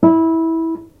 A C played on my guitar recorded with a sort of muffled sound
guitar, single-note, muddy, noise, muffled